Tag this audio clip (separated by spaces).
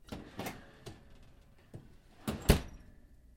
Metal field Kitchen recording